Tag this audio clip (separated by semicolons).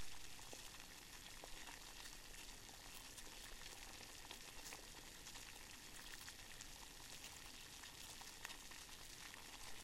boiling; boiling-water; kettle; Kitchen